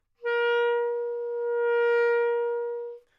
Clarinet - Asharp4 - bad-dynamics-tremolo
Part of the Good-sounds dataset of monophonic instrumental sounds.
instrument::clarinet
note::Asharp
octave::4
midi note::58
good-sounds-id::3467
Intentionally played as an example of bad-dynamics-tremolo